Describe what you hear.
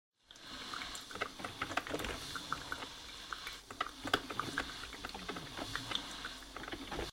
listen to a coffee machine prepare coffee